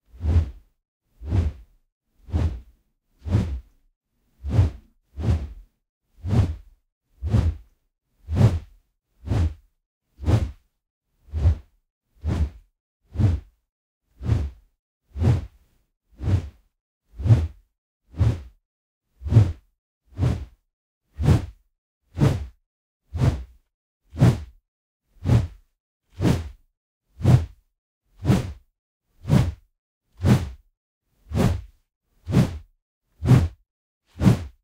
swipes and whooshes boompole low slow and long swings stereo ORTF 8040
This sound effect was recorded with high quality sound equipment and comes from a sound library called Swipes And Whooshes which is pack of 66 high quality audio files with a total length of 35 minutes. In this library you'll find different air cutting sounds recorded with various everyday objects.
tennis, body, racket, swipes, punch, box, attack, fast, whooshes, whoosh, combat, swing, beat, swings, martial-arts, foley, kickbox, whip, battle, swipe, fighting